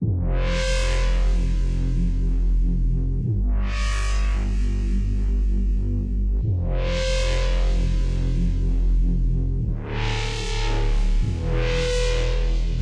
Hypo-DistBass-150bpm
Distorted biting bassline loop.
At your own risk :)